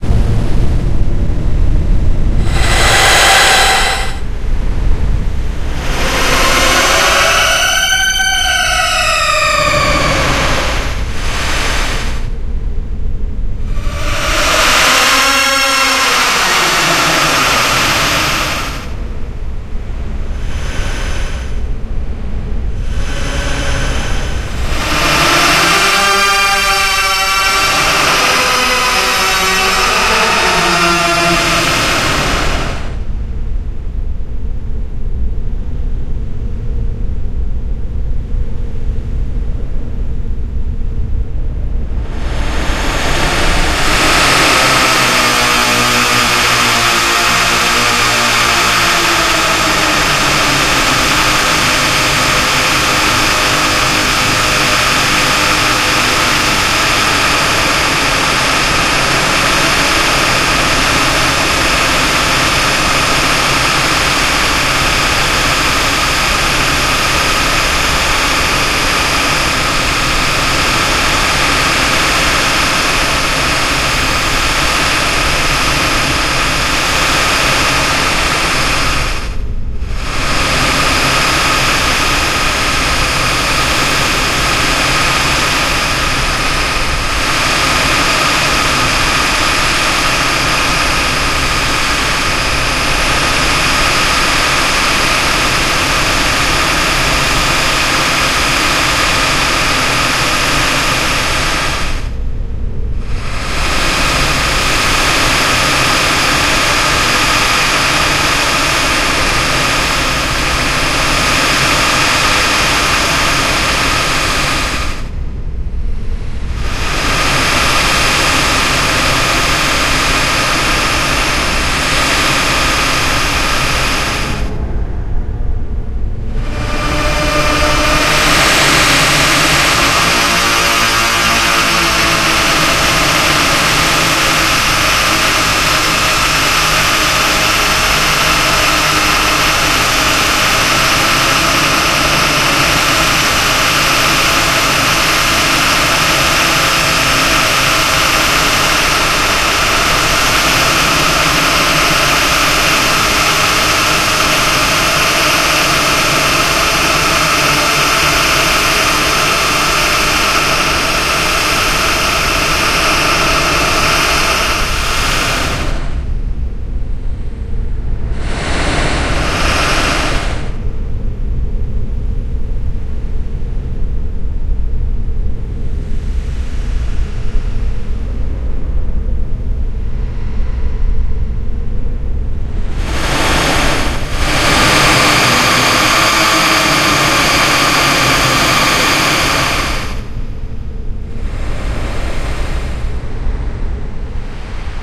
Edited version of one of squeaky chair in the office recordings processed with Paul's Extreme Sound Stretch to create a ghostlike effect for horror and scifi purposes.
squeak, scary, spooky, evil, haunting, paranormal, texture, stretch, demonic, ghost, chair